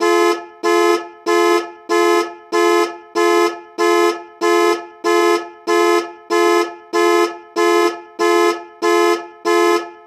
WW2 Siren
An attempt to emulate a German WW2 alarm.
alarm, car-alarm, claxon, german, loop, mono, request, siren, ww2